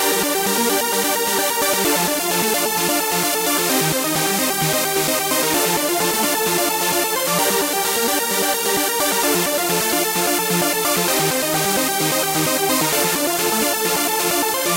If We Only Knew 02
trance, hardcore, synth, sequence, kickdrum, hard, melody, pad, beat, distortion, drumloop, techno, 150-bpm, kick, bass, drum, distorted, phase, strings